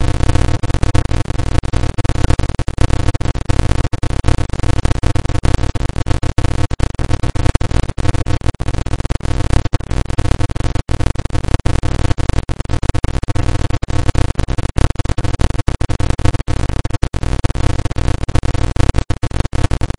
left: p[200 t] Sin[100 t + 440] / right: p[200 (t + 13)] Sin[100 t + 440] for t=0 to 20